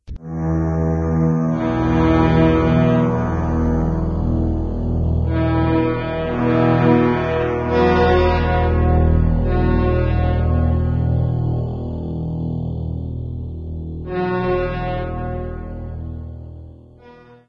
Orchestral 4of5
Yet again, strings and brass accents. The notes of these short compositions were picked entirely at random, but produce an intelligent and interesting, classical feel.
ambient, classical, composition, dramatic, emotional, epic, instrumental, interlude, orchestral, track